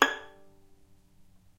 violin pizz vib G#5
violin pizzicato vibrato
pizzicato, violin, vibrato